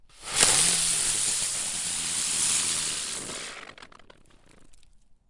bee firework
Setting off a spinning "bee" type firecracker which then falls to the ground in a bush
field-recording, fire, stereo